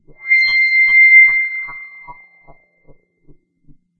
Multisamples created with subsynth. Eerie horror film sound in middle and higher registers.